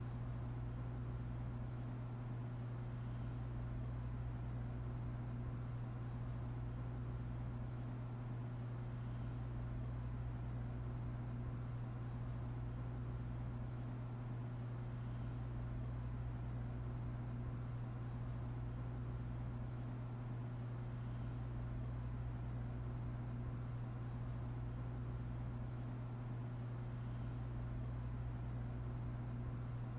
Kitchen Room Tone
Empty room tone of a large and open kitchen.
Tone, Room